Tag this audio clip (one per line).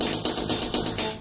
Amiga
Amiga500
bass
cassette
chrome
collab-2
Sony
synth
tape